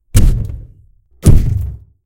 Two big thuds, for something large dropping or walking.
Made for a short film:
room, vibrate, loud, impact, huge, struck, vibrating, enormous